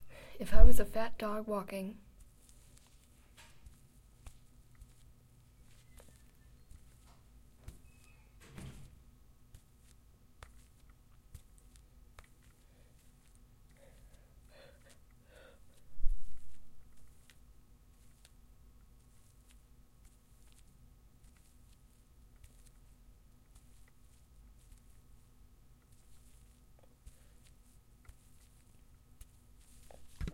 paws on carpet
simulated dog-paws padding
padding, simulated